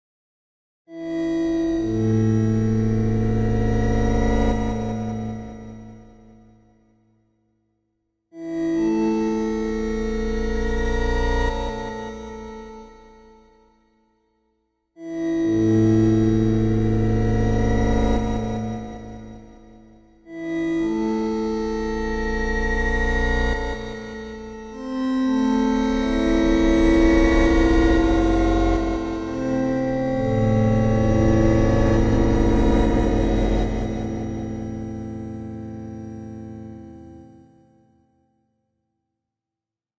A metallic, eerie, and dark synthesiser sound.